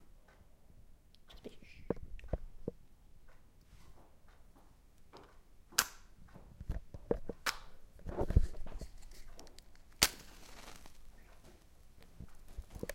Striking a match on a match box.
field-recording, fire, match, strike